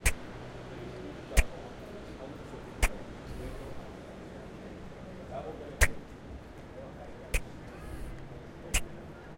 AmCS JH TI70 tg tg tg
Sound collected at Amsterdam Central Station as part of the Genetic Choir's Loop-Copy-Mutate project
Amsterdam, Central-Station, Time